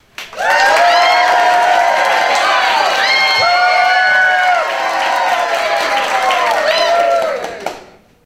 Audience Cheer With Applause
Recorded with Sony HXR-MC50U Camcorder with an audience of about 40.
applause, audience, cheer, crowd